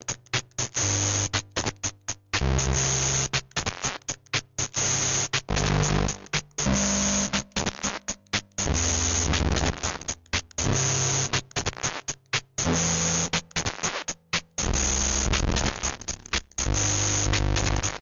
circuit bent keyboard